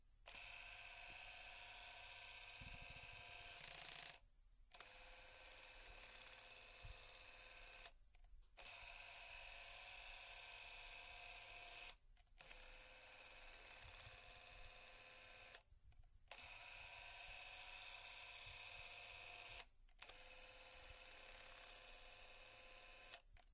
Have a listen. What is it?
Super 8 Camera Zoom Motor (GAF ST-802)
The zoom motor on a GAF ST-802 super 8 camera.
motor, super8, film, camera, zoom